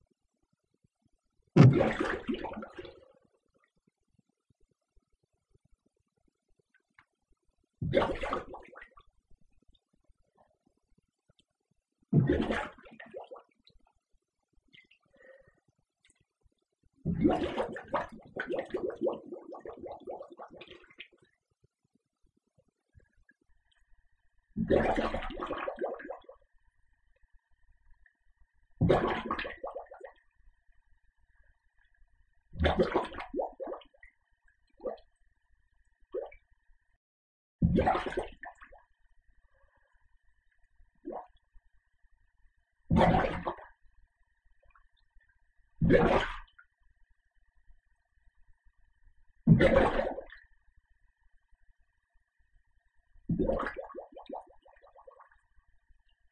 Quick bubble rushes
Many quick various bubble rushes.
bubble, quick, rush, whoosh